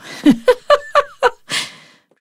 CLOSE FEMALE LAUGH 013
A well-known author visited the studio to record the 'audio book' version of her novel for her publisher. During the 16 hours (!) it took to record the 90,000 word story we got on really well and our jolly banter made it onto the unedited tracks. The author has given me permission to keep and share her laughter as long as I don't release her identity. Recorded with the incredible Josephson C720 microphone through NPNG preamp and Empirical Labs compression. Tracked to Pro Tools with final edits performed in Cool Edit Pro. At some points my voice may be heard through the talkback and there are some movement noises and paper shuffling etc. There is also the occasional spoken word. I'm not sure why some of these samples are clipped to snot; probably a Pro Tools gremlin. Still, it doesn't sound too bad.